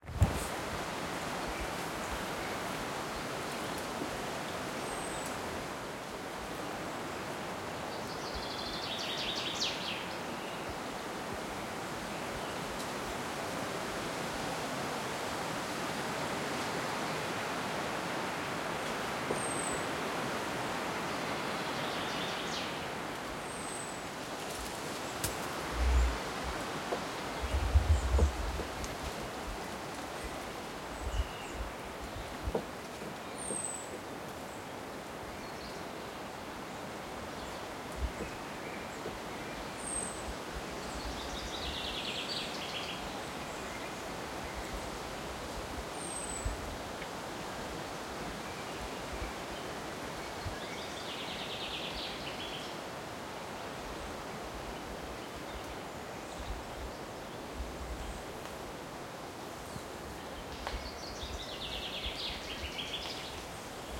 Forest atmosphere with birds in the background
This sound recording i made during a little walk in the forest
ambiance, ambient, Forest